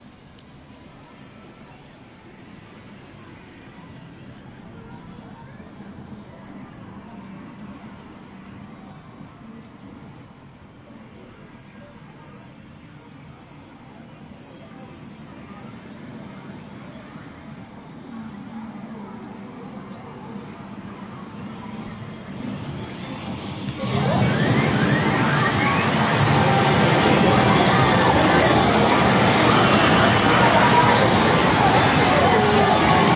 Japan Matsudo Pachinko Doors Open Short
I recorded the sound of several pachinko parlors (vertical pinball machines, for recreation and gambling), in Matsudo, Chiba, east of Tokyo. Late October 2016. Most samples recorded from outdoors, so you can hear the chaotic cacophony of game sounds when the doors open.